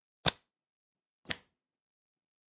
dropping booklet on table
dropping a small booklet on a table
booklet, dropping